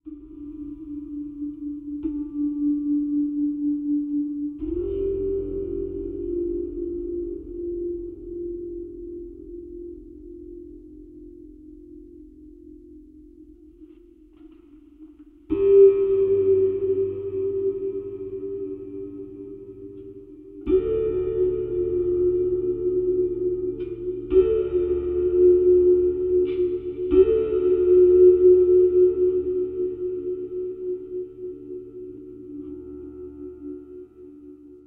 juskiddink Tuning a Roland Oetter acoustic guitar vocalling-rwrk
remix of "Tuning a Roland Oetter acoustic guitar" added by juskiddink (see remix link above)
slow down, filter, stereo tremolo, reverb